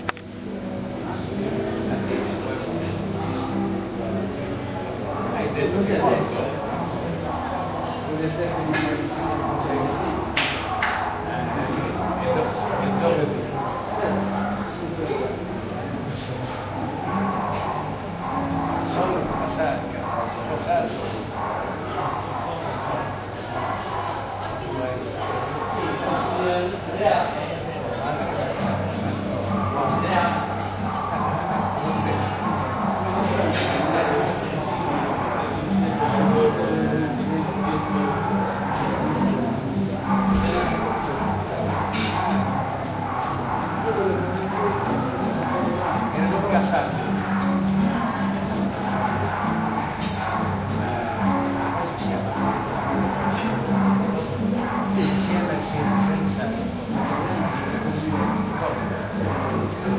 ambience in bars, restaurants and cafés in Puglia, Southern Italy. recorded on a Canon SX110, Lecce
caf, italy, restaurant, ambience